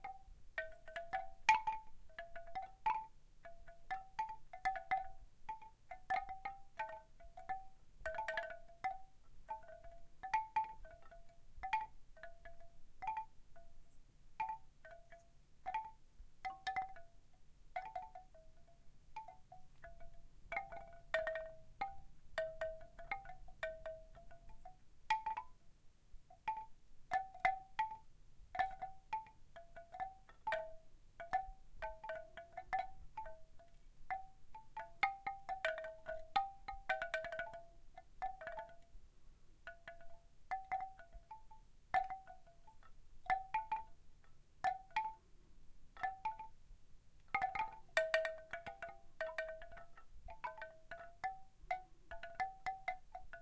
WindChin-cut
bamboo, bells, chime, windchime